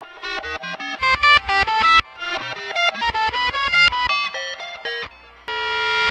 guitar ring fx 2
A partially reversed guitar with ring modulator and other fx involved